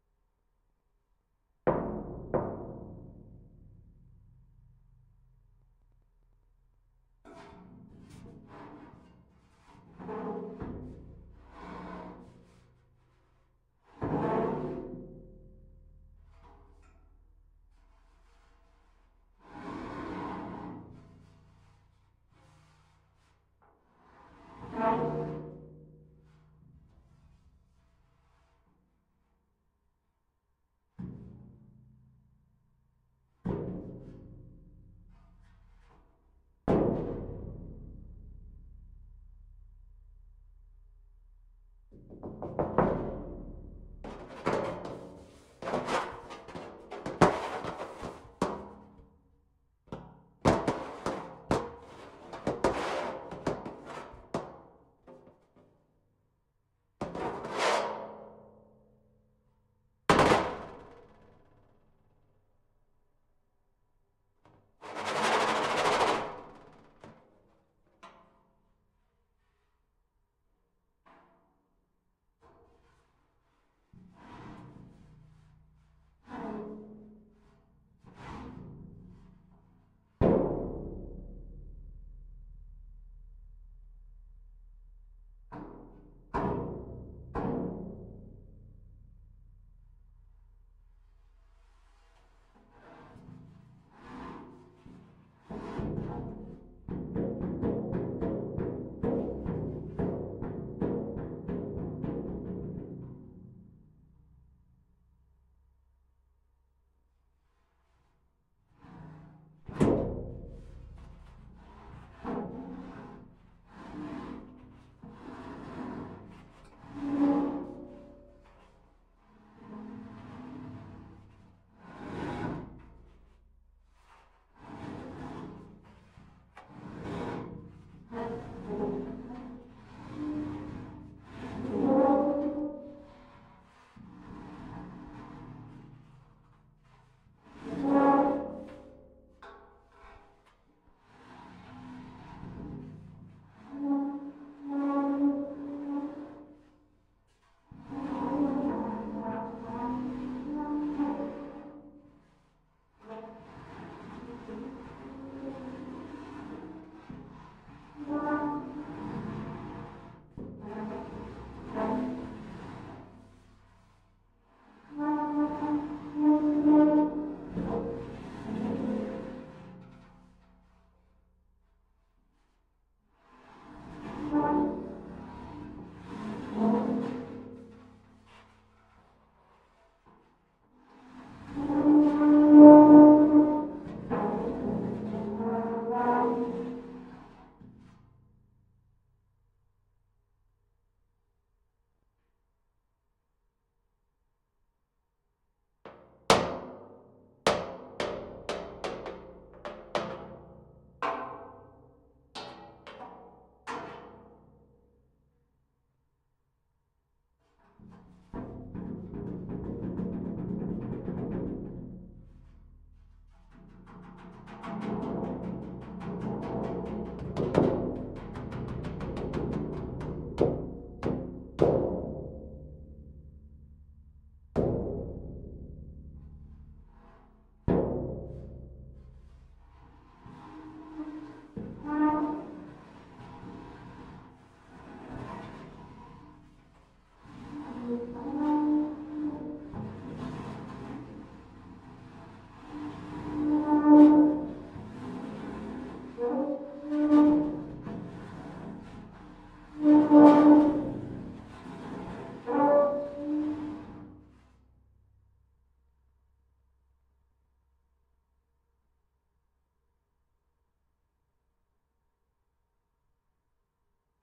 contact mic on metal shelf
contact-mic, industrial, metal, scrape